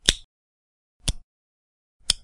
Multiple recordings of disassembling two LEGO Bricks.